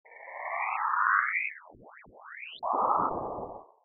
drawn synthesis
edited, sample, synthesis